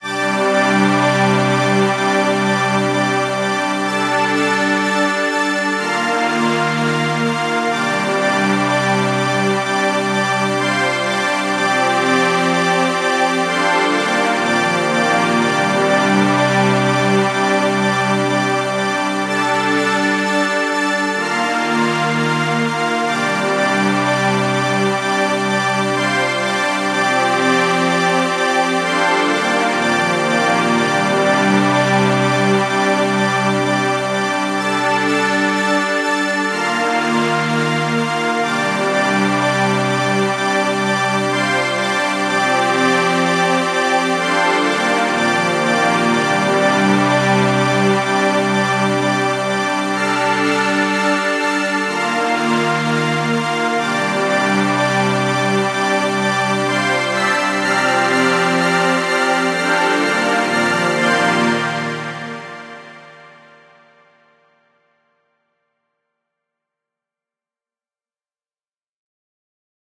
LEARNING - TWO BACKS MIX - FULL STRINGS & PAD
This is a part of the song who i consider is the most important in this mix version. There have 5 parts of the strings and pad, and the conformation if you listen attentionally.
pad, mix, backs, pads, two, learning, strings